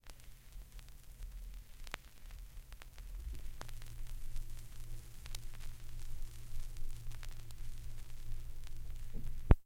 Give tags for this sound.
album; crackle; lofi; LP; noise; noisy; pop; record; surface-noise; turntable; vintage; vinyl; vinyl-record

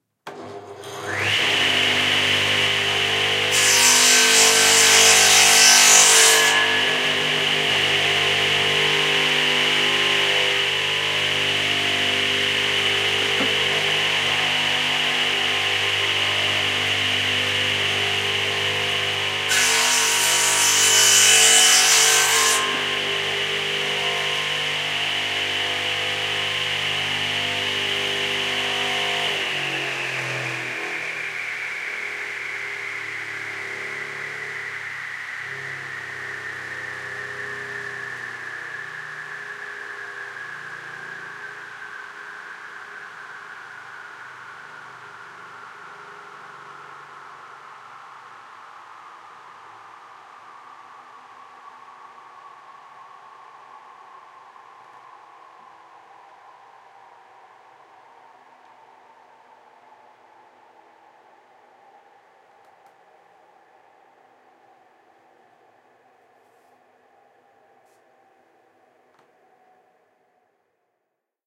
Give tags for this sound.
machine; wood; saw; bench-saw; carpentry; cutting; woodwork; machinery; electric; mechanical; circular-saw; circular; joinery; crosscutting; sawing; field-recording

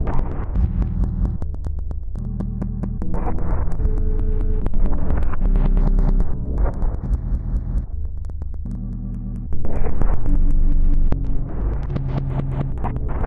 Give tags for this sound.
abstract; broken; digital; electric; freaky; futuristic; glitch; machine; mechanical; noise; sound-design; strange

